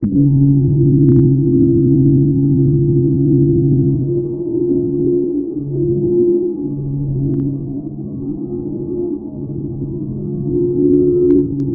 turret tank 2
new tank's turret.